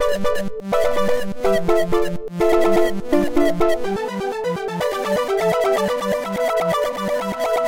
A simple tune which is different but catchy.
This was created from scratch by myself using psycle software and a big thanks to their team.
ambient, bass, beat, Bling-Thing, blippy, bounce, club, dance, drum, drum-bass, dub, dub-step, effect, electro, electronic, experimental, glitch-hop, humming, hypo, intro, loop, loopmusic, pan, rave, synth, techno, trance, waawaa